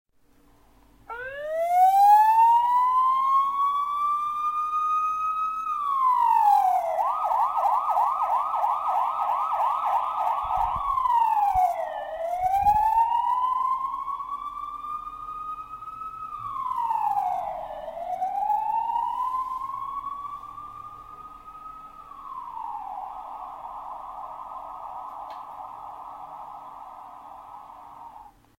Police siren remix
Police sirens I used for "Last Badge Shining_preview", one of my story-ideas turned audio.
The base file IS manually recorded by me, on a chromebook R13 (yes, I am poor, yes, I am ex-homeless), and I ran it thru several legally free APPS to filter, balance, add flat equalizer, and increase loudness.
I expect all of you to be able of file-conversion.
siren, alarm